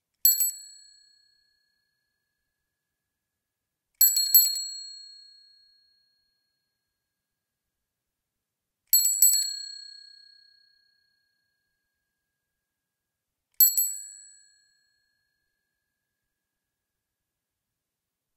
Bike bell
Bike wheel recorded with an AKG 414 through Apogee Duet.